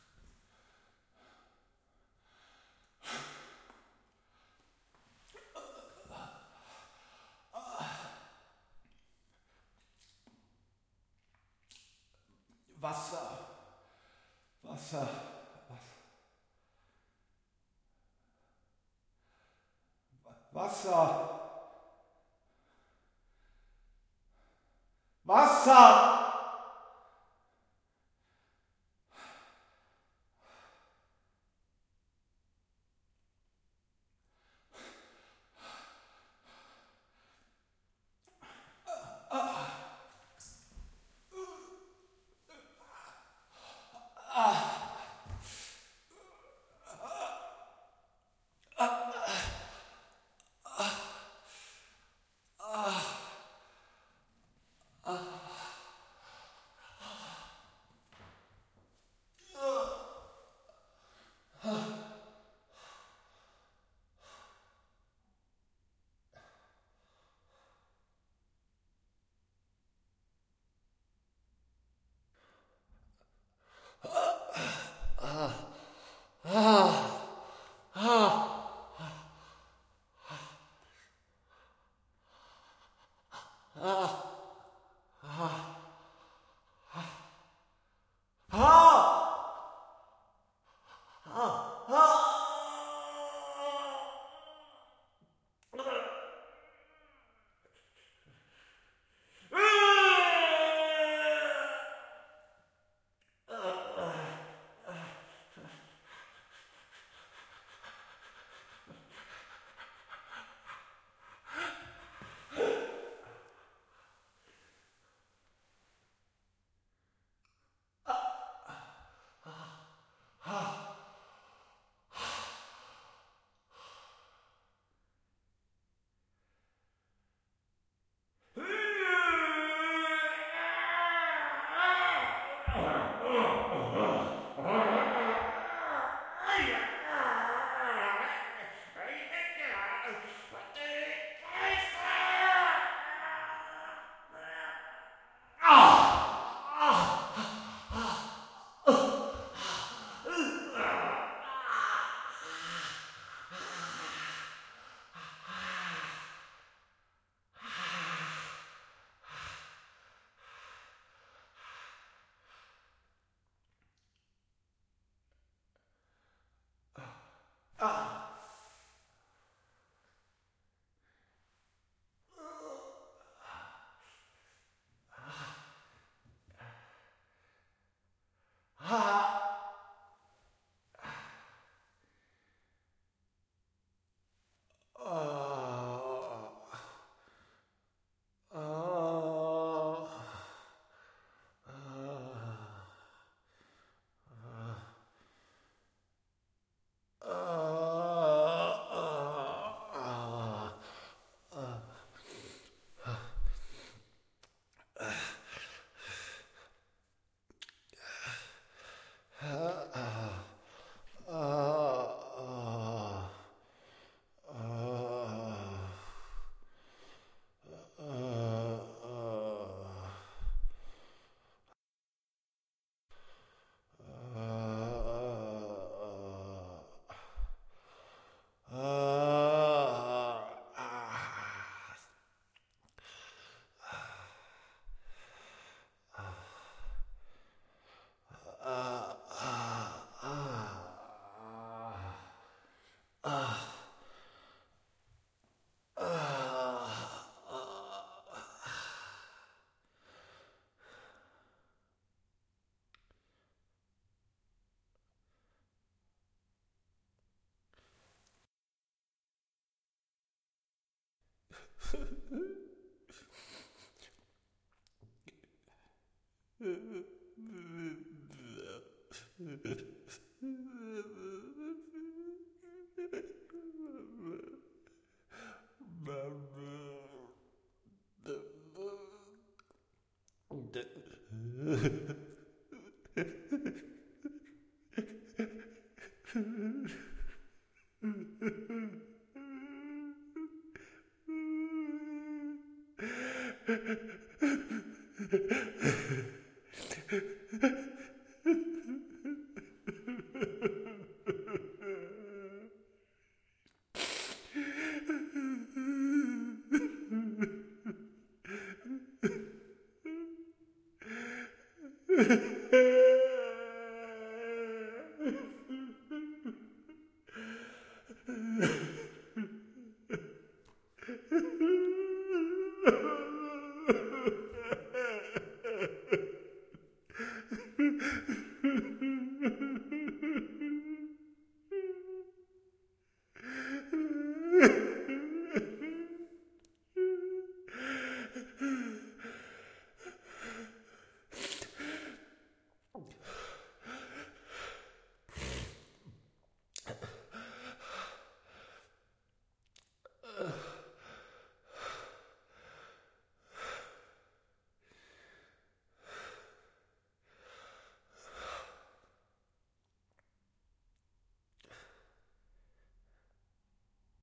Man Pain Breathing War
Needed different sounds of men crying in pain. So recorded a set of different noises, grunts and crys. Made some fast mixes - but you can take all the originals and do your own creative combination. But for the stressed and lazy ones - you can use the fast mixes :-) I just cleaned them up. Si hopefully you find the right little drama of pain for your project here.